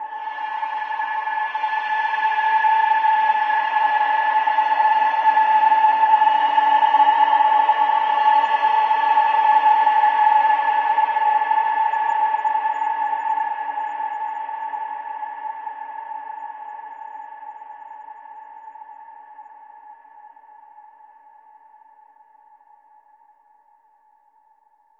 Thin deep space. High frequencies. Created using Metaphysical Function from Native Instrument's Reaktor and lots of reverb (SIR & Classic Reverb from my Powercore firewire) within Cubase SX. Normalised.
space, drone, deep, soundscape, ambient